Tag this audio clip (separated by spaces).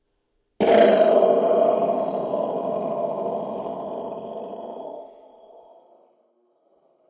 bomb bang owi explosion explode war rocket battle rockets mortar missle boom